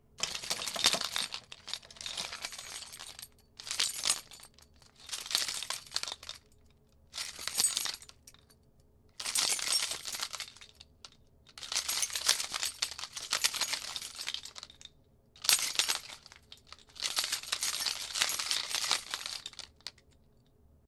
keys-jingling
Keys are held and repeatedly shaken hard and slow.
jingle, keys